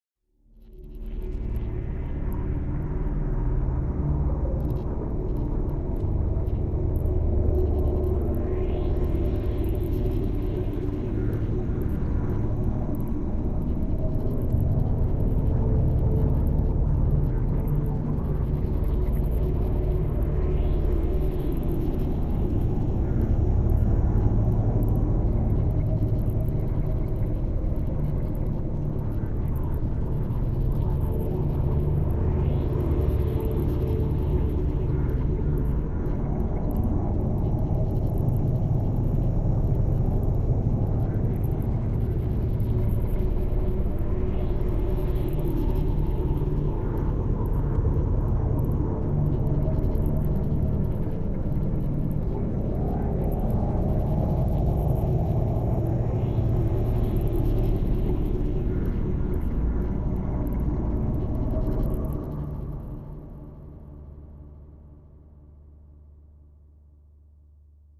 This drone conveys the ambience of the engine room of an abandoned, yet still functional, interstellar space craft. It was recorded in Reaper using the Wusik 8000 sampler/synth for the background hum with AAS Player providing the incidental sounds.